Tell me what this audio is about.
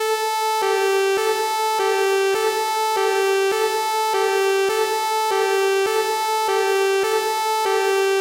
A "high-low" siren effect synthesized in Audacity. A dry version is also available on request.